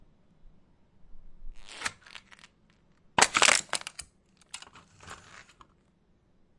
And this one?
Sound of crushing aluminum can.